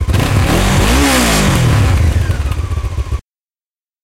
Honda CBF 500 short roar
Recorded with Tascam DR-40 in X-Y mode. Roaring Honda CBF 500 engine. With some metallic sound from the muffler.